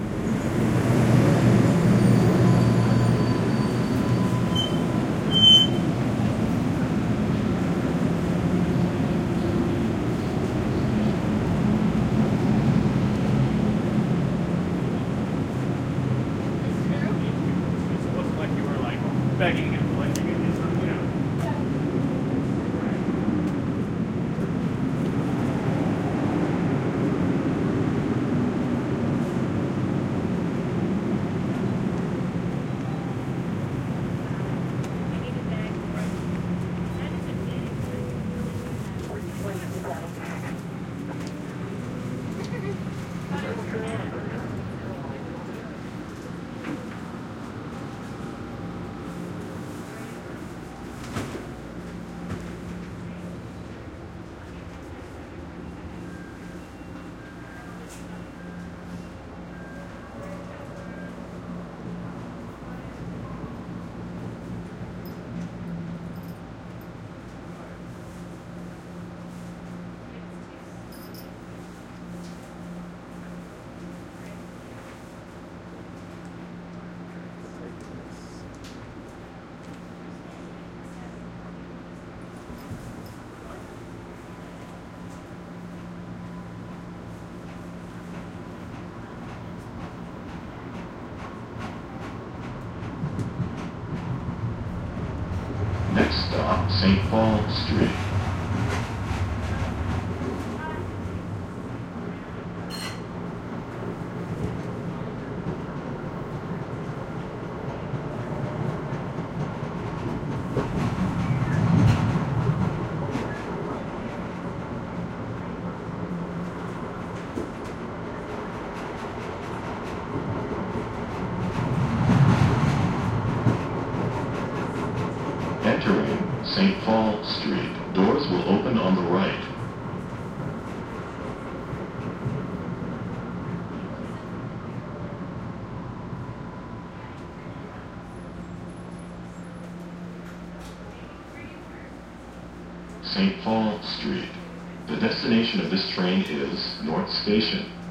Exterior of the T pulling up, I enter, and then it pulls away with me on it. Recorded using 2 omni's spaced 1 foot apart.
subway, field-recording, boston, mbta, t, stereo, train